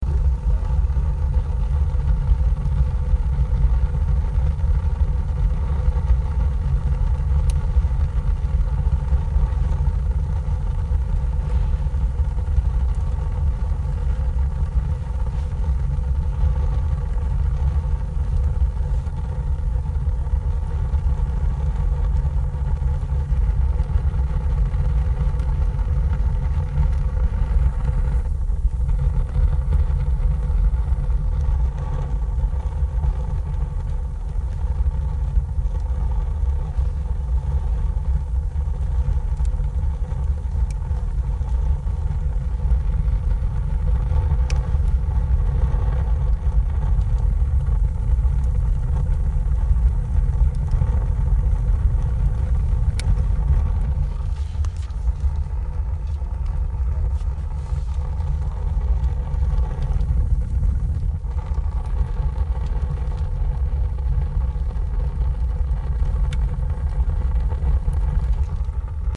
Masonry heater at cabin
A masonry heater burning with the ventilation open.
fire, heater, burning